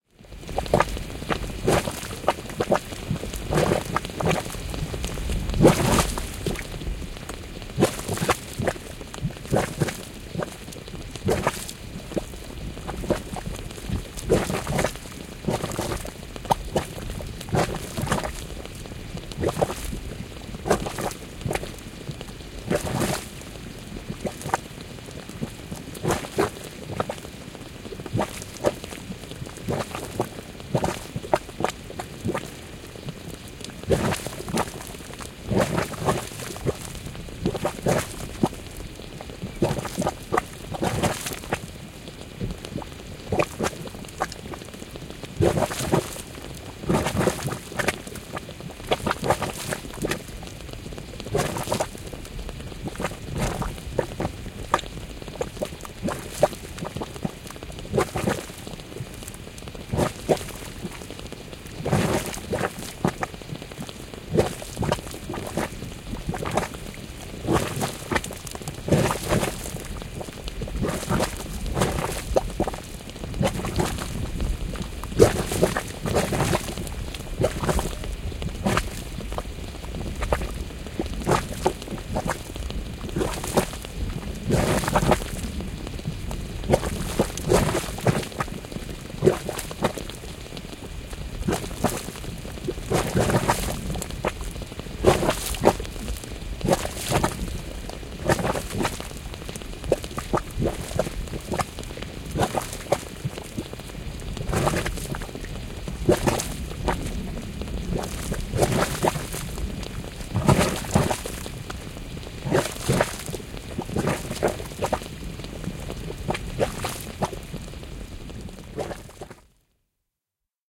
Islanti, muta kuplii, kiehuu, kuuma lähde, mutalähde / Iceland, geothermal mud boiling, bubbling, hot spring, a close sound
Savilähteen kuplintaa, pientä porinaa ja isoja, paksuja loiskahduksia. Lähiääni.
Paikka/Place: Islanti / Iceland
Aika/Date: 1981
Boil, Bubble, Clay, Field-Recording, Finnish-Broadcasting-Company, Geoterminen, Iceland, Islanti, Kiehua, Kuplat, Kuplia, Mud, Muta, Pool, Pulputtaa, Pulputus, Savi, Soundfx, Tehosteet, Yle, Yleisradio